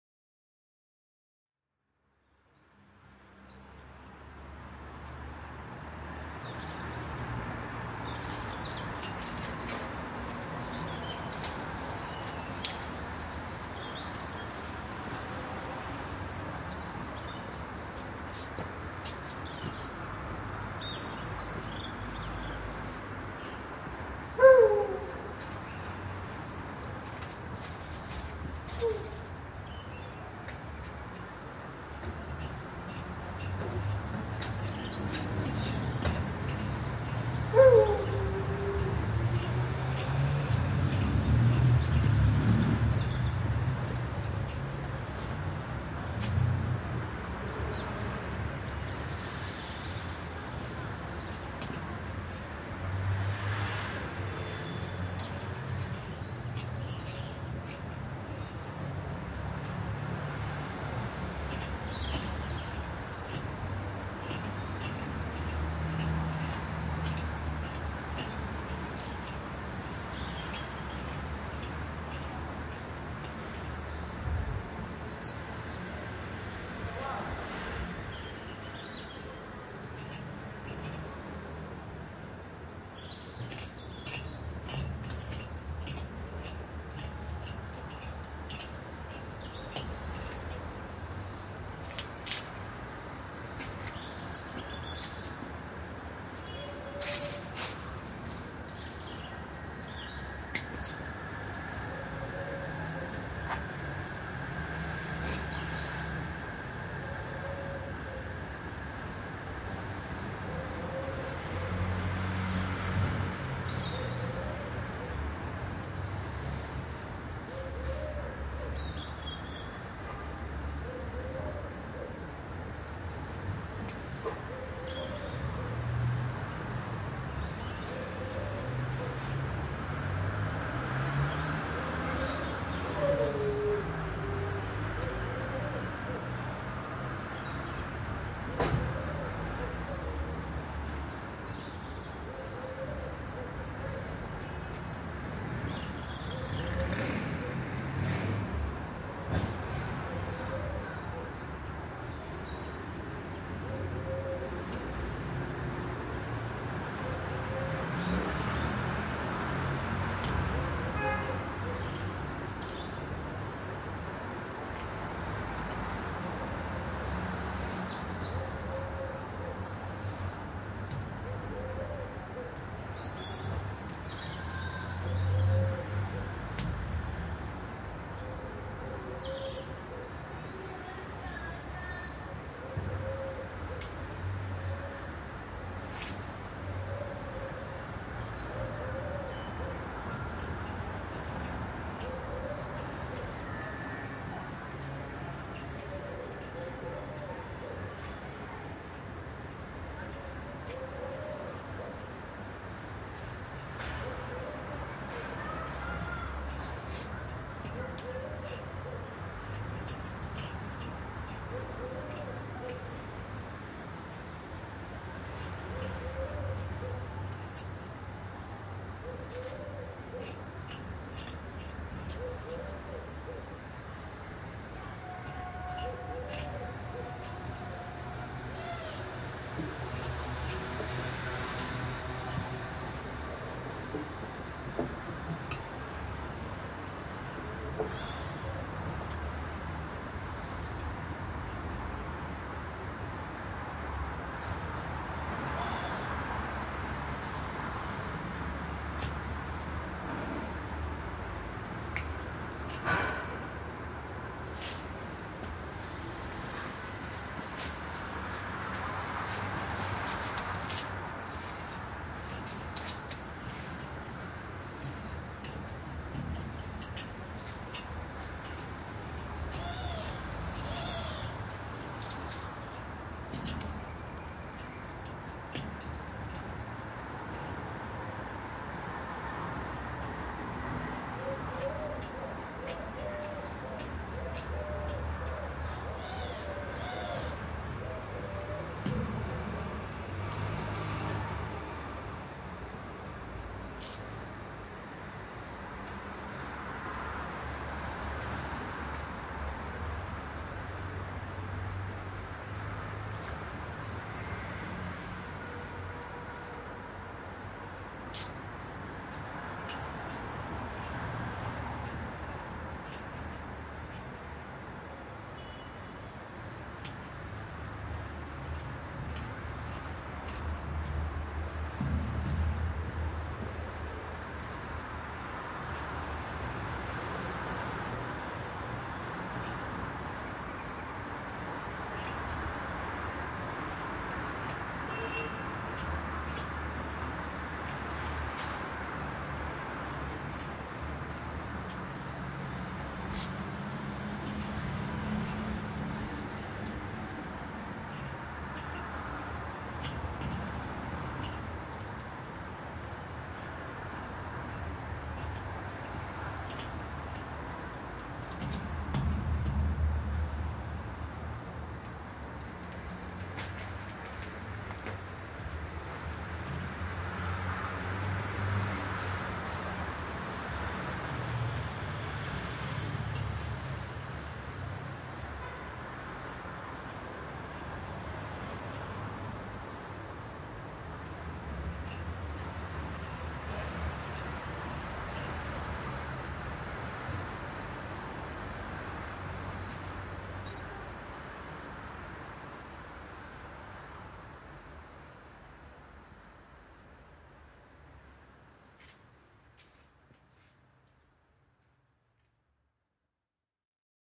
date: 2010, 21th Feb.
time: 03:00 PM
place: via Buonriposo (Palermo, Italy)
description: Sound recorded in a house on one side overlooking a fairly busy street and on the other side overlooking a huge garden. This is huge garden side.